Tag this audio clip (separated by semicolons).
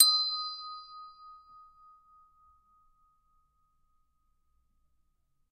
percussion; bell; Christmas